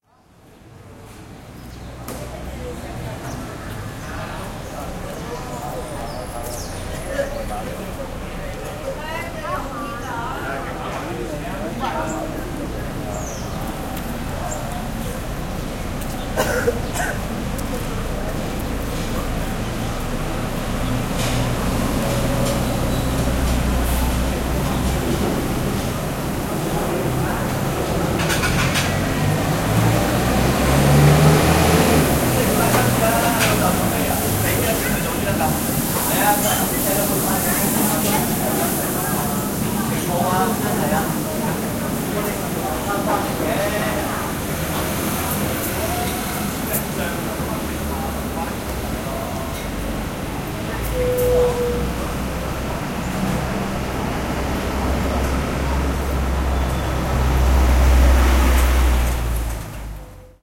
USJ Group B2 (2014) - Communication & Media Field Recording (Camões Plaza)
Soundscape Field Recording for the Music and Audio Module 2014, in the Communication & Media Program at the University of Saint Joseph - Macao SAR, China.
The Students conducting the recording session were: Chilam Ng, Chester Tam, Marco Chan and Ernest Lei
soundscape, macao, University-of-Saint-Joseph, field-recording